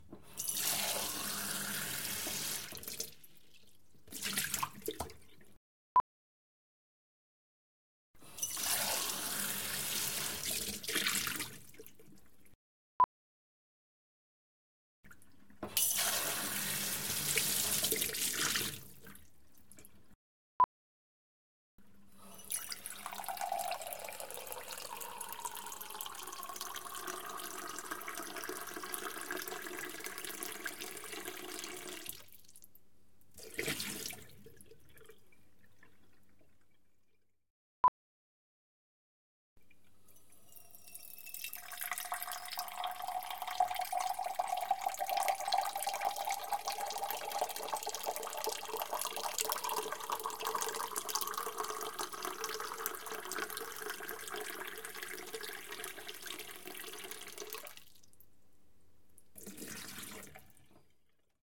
Filling cup up with water
catering, restraunt, stereo